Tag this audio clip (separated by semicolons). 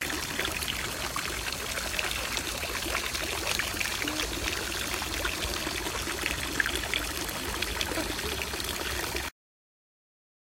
fountain; water; field-recording